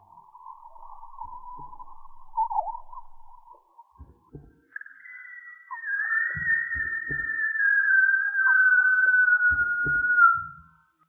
Unscreamed, vol. 3
I'm going to place some parts of damped-or-not scream.
creepy, horror, macabre, spooky, thrill, weird